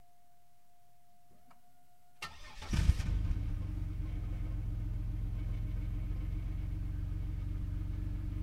car start muffler
this is a recording of a 2000 Buick Lesabre being started at the muffler.
automobile, car, drive, engine, ignition, starting, vehicle